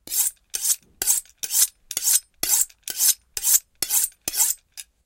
Knife Sharpen Medium
Felix Solingen Chef's knife (blade 23 cm, 9") being sharpened on a Wusthof-Trident steel.
foley, wusthof-trident, felix-solingen, scrape, sharpen, knife, blade, sharpening, metal, stereo, steel, knife-steel